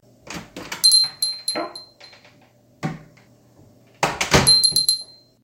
door open close with bell
Similar to a store door that rings a bell when it is opened or closed
with-bell, door-open